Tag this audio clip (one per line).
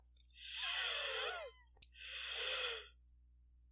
wheezing
wheeze